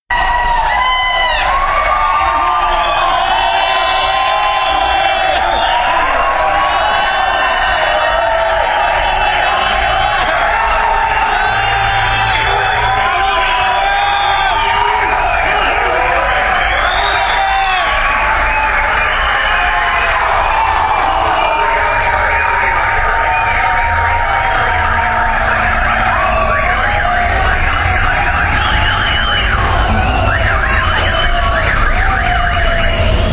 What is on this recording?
Cyclists Protest in June 2014, Chile